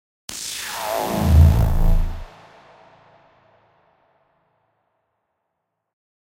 We create effects and synth noise FX for radio station imaging.
alien, drone, effect, effects, experimental, fx, noise, sound